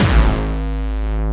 HardcoreKick Seq01 13
A distorted hardcore kick
hardcore, distorted, kick, one-shot